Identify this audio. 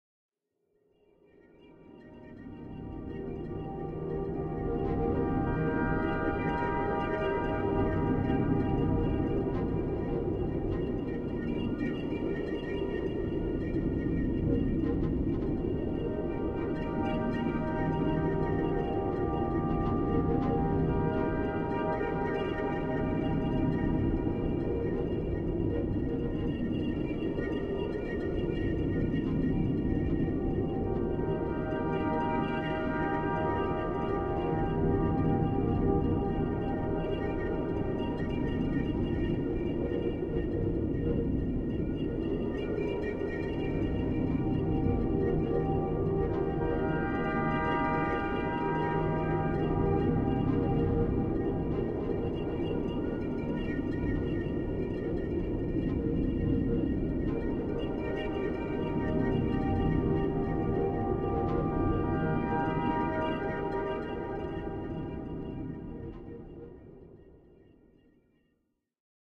Wind magical Aeolus soaring
A magical storybook wind as the hero flys through the air and soars amidst the clouds.
Granulated wind instruments in Max/msp and Logic 7 Pro